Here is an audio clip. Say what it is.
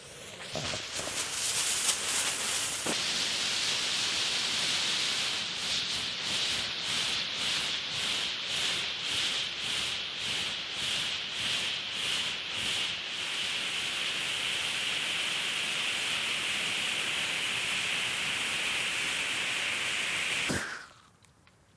13GBernardD svetlice
Bengálský oheň - světlice (pyrotechnika)
flare, pyro